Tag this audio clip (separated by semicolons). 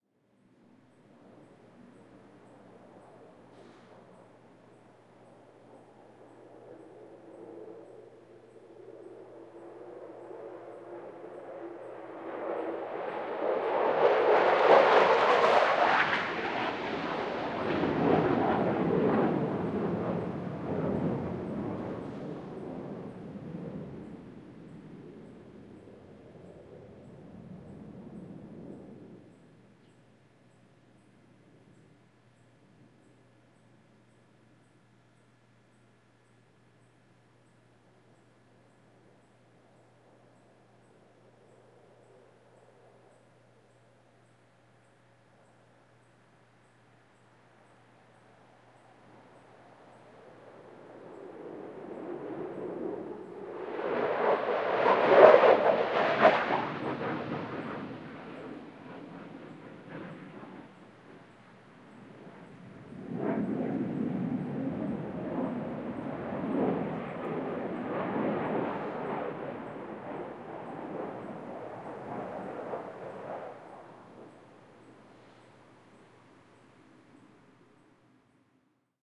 fighter; jet; airport; airplane; plane; super-hornet; fighter-jets; flight; field-recording; FA18; air-show; ambience; aircraft; aviation